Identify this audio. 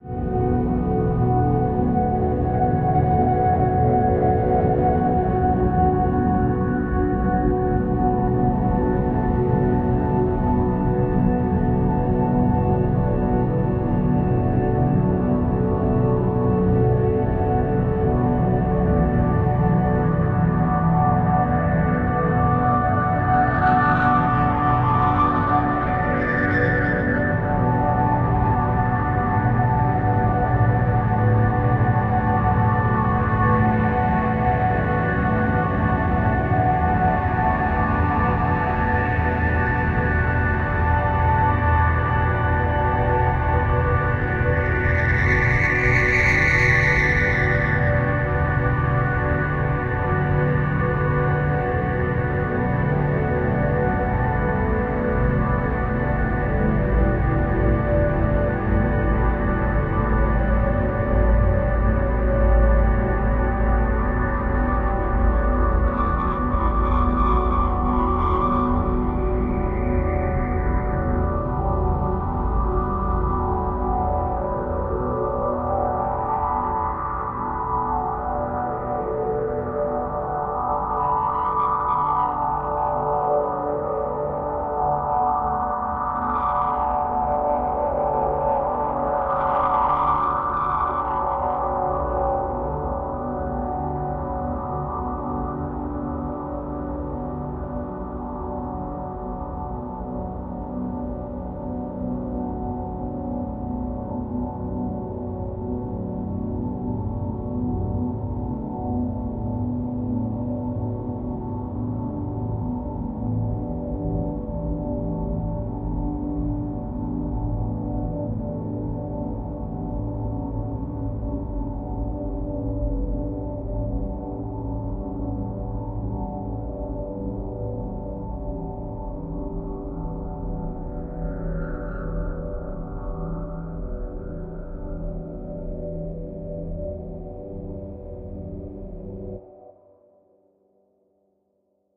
Paul's Extreme Sound Stretch
๐Ÿ…ต๐Ÿ† ๐Ÿ…ด๐Ÿ…ด๐Ÿ†‚๐Ÿ…พ๐Ÿ†„๐Ÿ…ฝ๐Ÿ…ณ.๐Ÿ…พ๐Ÿ† ๐Ÿ…ถ